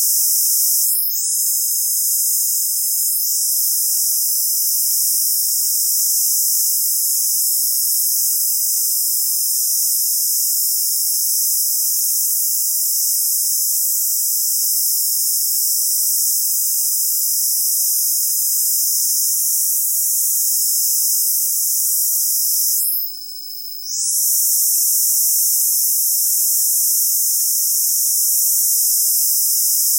audiopaint cicadas 3 copy
Synthetic cicadas made with Audiopaint. Version 3.
synthetic, insects, cicadas